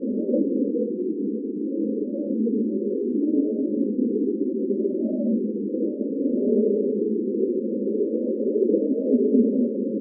Created with coagula from original and manipulated bmp files. Solar wind or alien atmosphere element.
space, synth, wind, image